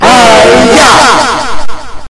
the sound of a person full of energy yelling hi-ya!
bacon, hi-ya, karate, kung-fu, punch, whack